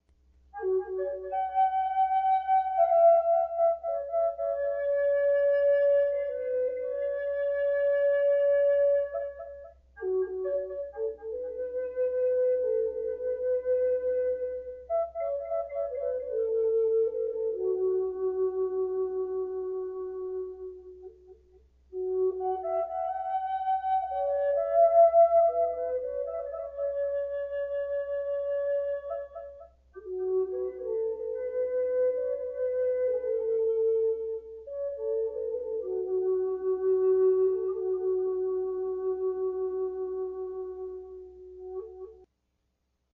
This is a brief recording of my native american flute using an echo enhancement.
native, melody, american, echo, flute